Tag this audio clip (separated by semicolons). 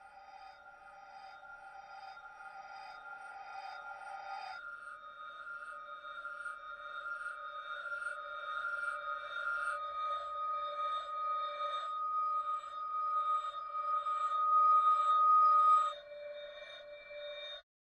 CHIMES; DISTORTED; EVIL; HORROR; NIGHTMARE; SUSPENSE; TERROR